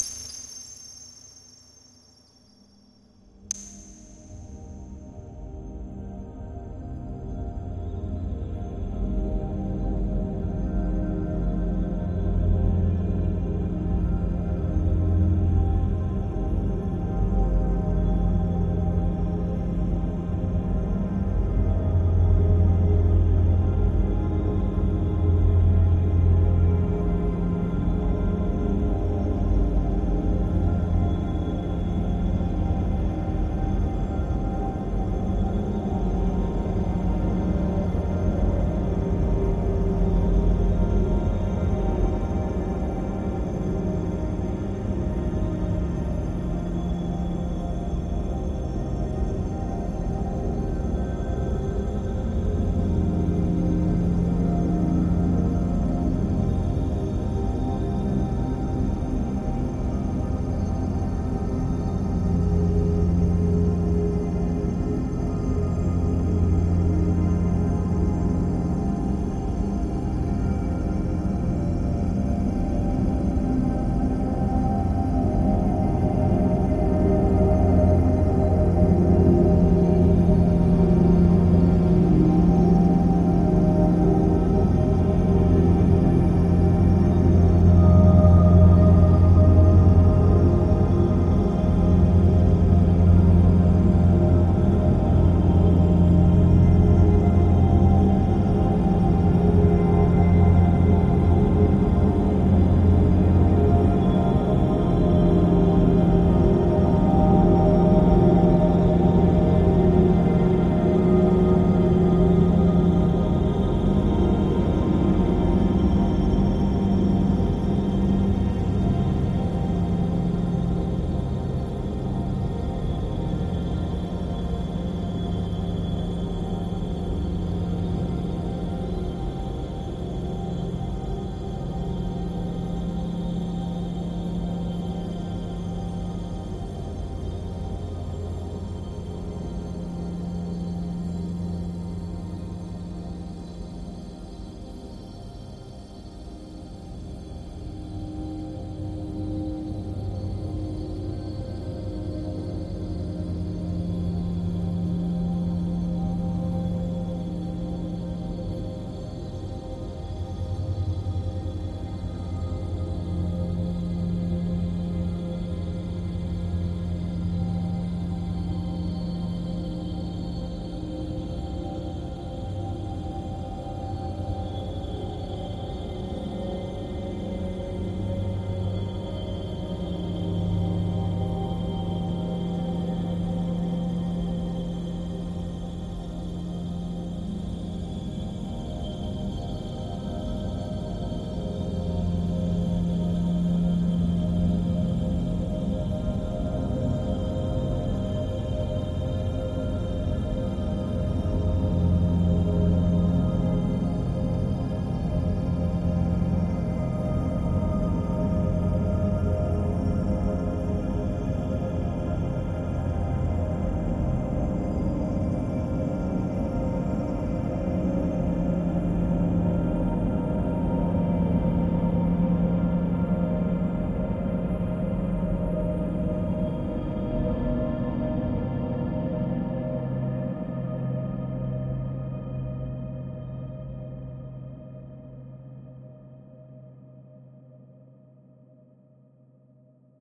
LAYERS 011 - The Gates of Heaven-123
LAYERS 011 - The Gates of Heaven is an extensive multisample package containing 128 samples. The numbers are equivalent to chromatic key assignment. This is my most extended multisample till today covering a complete MIDI keyboard (128 keys). The sound of The Gates of Heaven is already in the name: a long (exactly 4 minutes!) slowly evolving dreamy ambient drone pad with a lot of subtle movement and overtones suitable for lovely background atmospheres that can be played as a PAD sound in your favourite sampler. At the end of each sample the lower frequency range diminishes. Think Steve Roach or Vidna Obmana and you know what this multisample sounds like. It was created using NI Kontakt 4 within Cubase 5 and a lot of convolution (Voxengo's Pristine Space is my favourite) as well as some reverb from u-he: Uhbik-A. To maximise the sound excellent mastering plugins were used from Roger Nichols: Finis & D4. And above all: enjoy!
ambient, artificial, divine, dreamy, drone, evolving, multisample, pad, smooth, soundscape